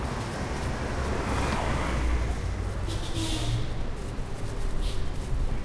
Sounds of vehicles in the street of Bogotá, Chapinero